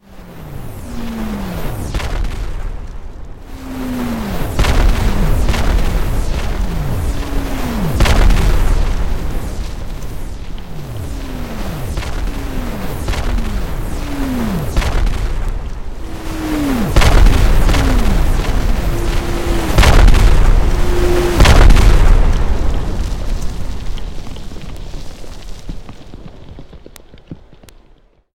Artillery Barrage I made with a Game Software called Unity.
Explosion Sound created by Steveygos93.
If you use this, you have to credit Steveygos93 for the original explosion.